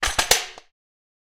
Gun Hammer Click
Similar to a sound of a hammer clicking to indicate that there is no ammunition chambered in the gun. Made from manipulation of the springs of a rusting stapler held back slowly then rate manipulated and pitch-shifted.
Application of this sound could be for first person shooters weapons such as pistols or any general mechanical weaponry.
cha-chak, mechanical, gun, sfx, dryfire, reload, weapon, click